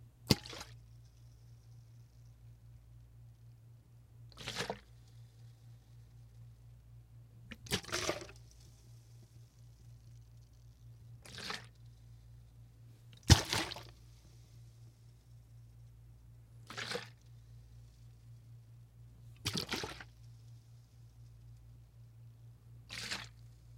Liquid sloshes in larger bottle, hitting sides of glass bottle, slowly shaking bottle back and forth one loud slosh others quiet